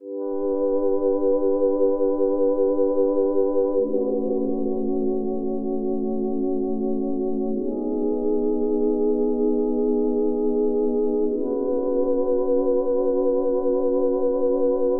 Light Pad 1
1/15 in a light pads collection I've pieced together. This one is airy, light, and a bit surreal.
light-pad, space, pad, heavenly